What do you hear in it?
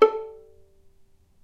violin pizz non vib A3
violin pizzicato "non vibrato"